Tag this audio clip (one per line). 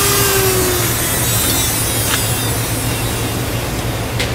machine
switched-off
metal
industrial
small
metal-processing
factory